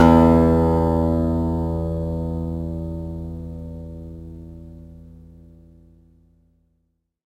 Sampling of my electro acoustic guitar Sherwood SH887 three octaves and five velocity levels
acoustic, guitar